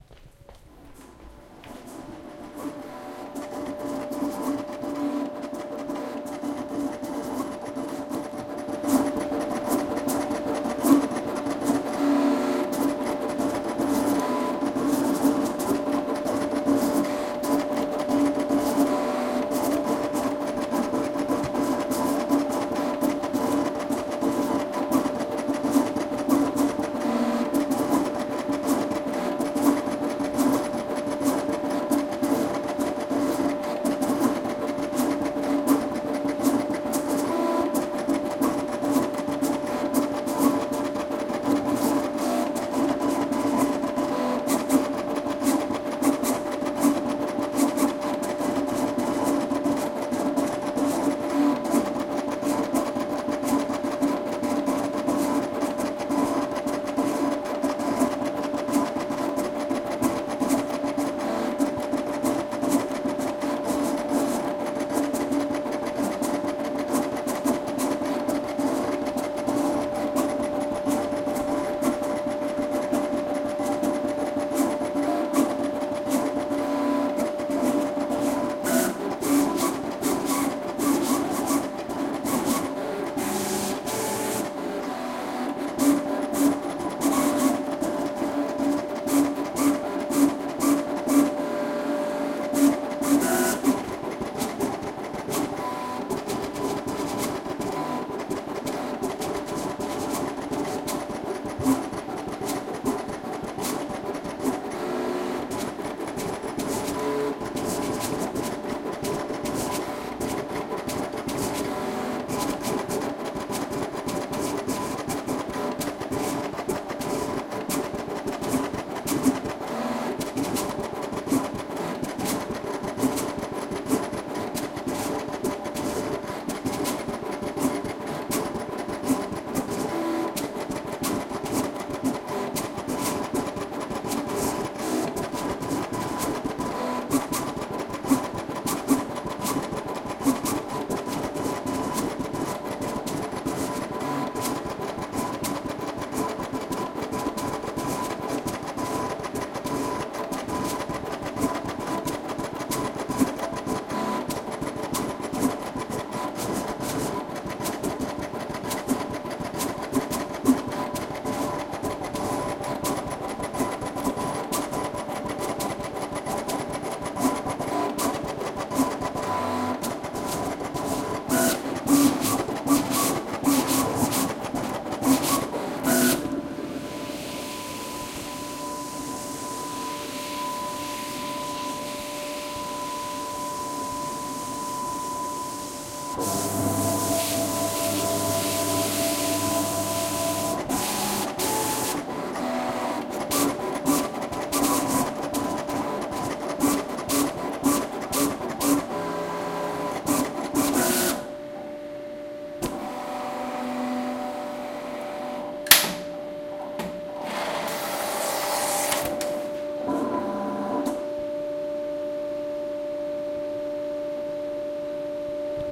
recorded a summa 120 plotter